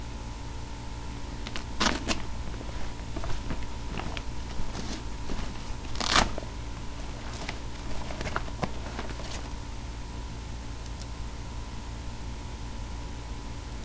getting a pen out of bag
the sound of retrieving a pen from within a messenger bag that closes with velcro